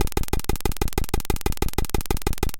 APC-RhythmicClicks1
APC, Atari-Punk-Console, diy, glitch, Lo-Fi, noise